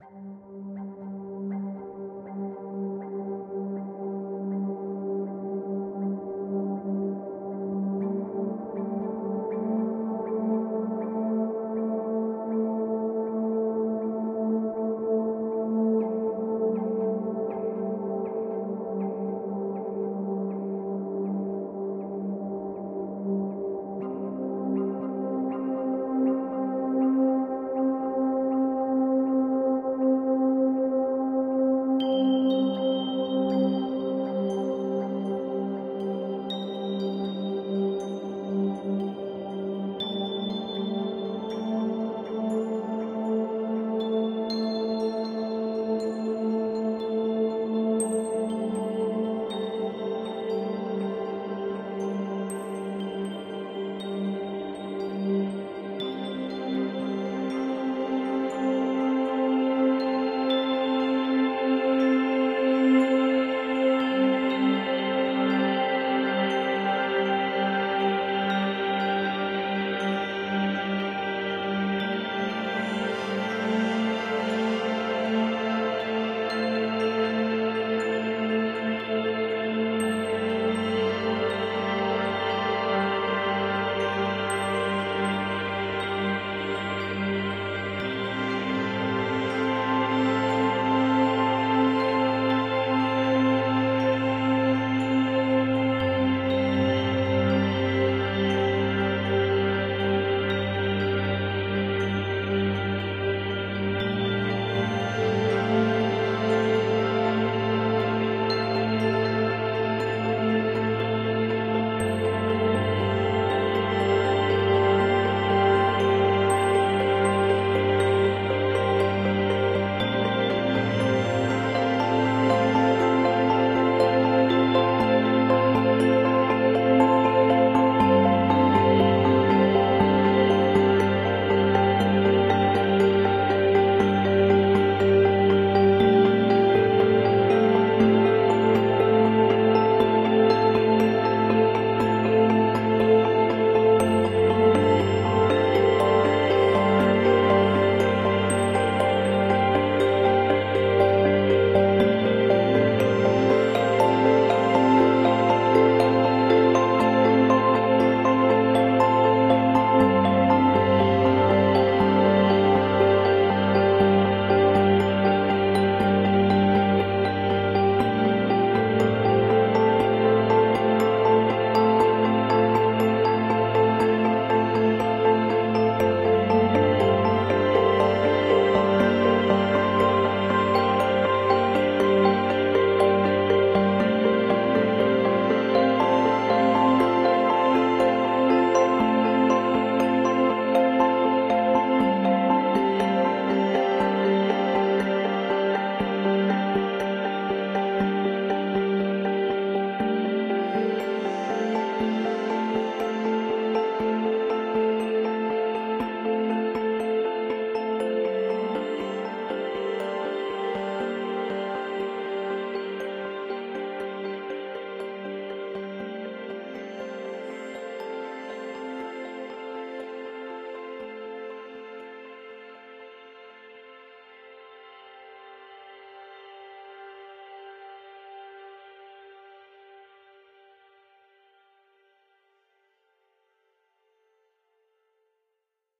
Surrealism (Ambient Mix)
This is an edited "Ambient version" of the original track's "Surrealism". Space pad. Plugins Omnisphere 2, Kontakt (Drums of War2), Dune 2.5. Bpm 60. Ableton 9.6.
ambient, atmospheric, chill, chillout, classical, deep, downtempo, drone, electronic, emotional, experimental, instrumental, melodic, music, piano, relax